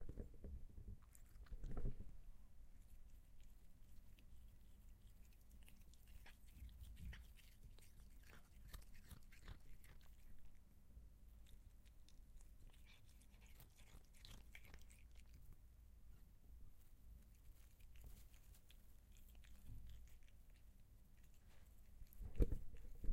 cat eat grass
Cat eating grass